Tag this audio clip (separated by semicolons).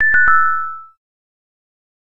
collect; object; pick-up; life; game; energy; item